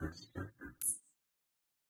Same as minimal-unprocessed, only thrown through a hi-pass filter.